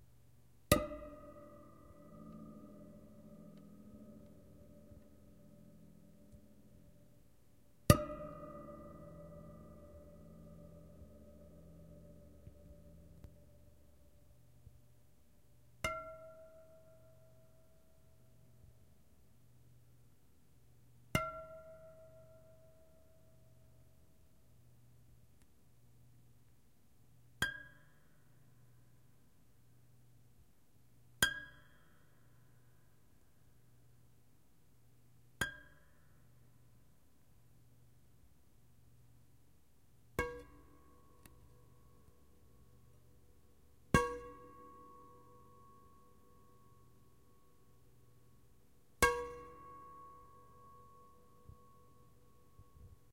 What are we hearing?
Bass Plunk
Plucking the very high, tight strings above the nut on an electric fender bass. Perfect for interesting or creepy sound design.
Recorded with a Zoom H4n.
plunk, effect, horror, ping, dark, high, electric, resonant, sound, creepy, guitar, pluck, fx, ethereal